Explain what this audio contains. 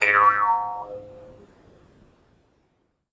jews harp 10
A pluck on a Jew's harp.
Recorded late at night in my bedroom on a Samsung mp3 player.
Unfortunately the recording have a lot less warmth to it than the instrument has in reality.
10 of 15
drone, guimbarde, jews-harp, ozark-harp, trump